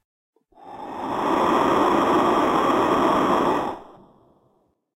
Steam escaping from a pressure cooker by opening the security valve. The recording was pitched down to less than 10 percent of the original speed, thus changing to a echoing sound which reminds of a spitfire dragon. Sony ECM-MS907, Marantz PMD671. Slight noise reduction.
blowing, dragon, fantasy, fire, magic, pressure, spitfire, steam